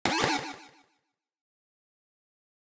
Retro Game Sounds SFX 52
shooting, effect, sfx, gamesound, sounddesign, Shoot, soundeffect, sound, fx, pickup, gameaudio, Sounds